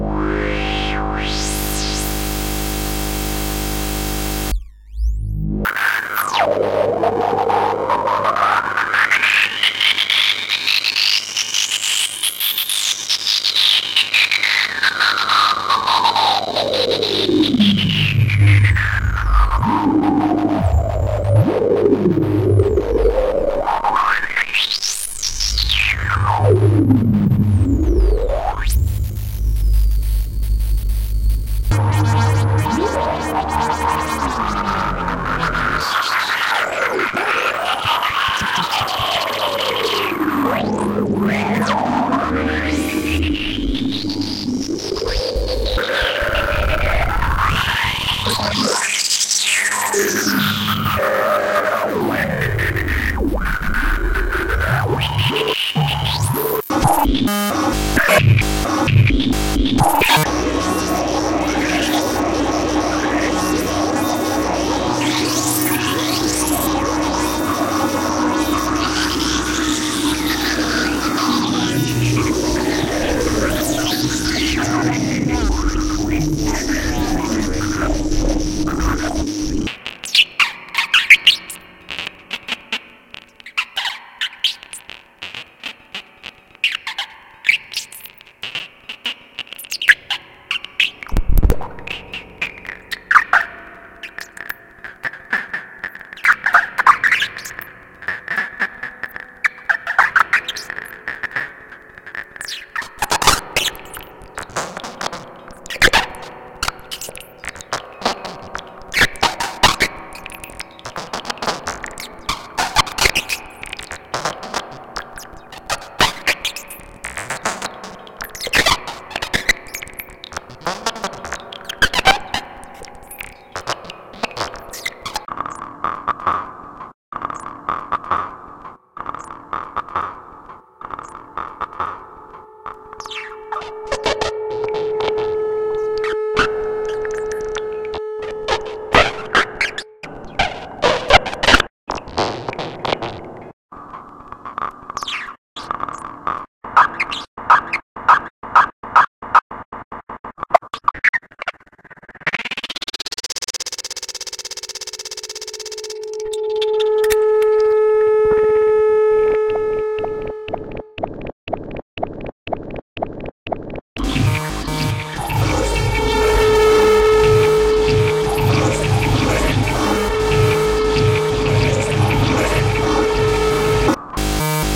NKLD Harvestman
Created and formatted for use in the Make Noise Morphagene by Noah Kalos and Lewis Dahm.
Dual mono textures, tones and noise birthed by The Harvestman Eurorack modules, with Doepfer Spring Reverb.
eurorack, mgreel, reverb, texture, the-harvestman